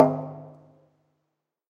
Recordings of different percussive sounds from abandoned small wave power plant. Tascam DR-100.
ambient, drum, field-recording, fx, hit, industrial, metal, percussion